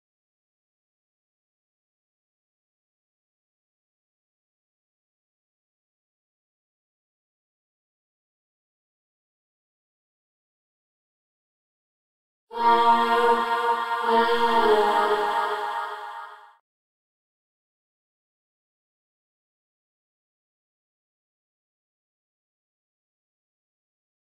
Short creepy vocal passage.